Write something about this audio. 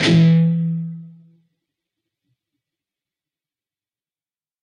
Dist Chr EMj up pm
A (5th) string 7th fret, D (4th) string 6th fret, G (3rd) string, 4th fret. Up strum. Palm muted.
rhythm-guitar, distorted, distortion, distorted-guitar, guitar, rhythm, guitar-chords, chords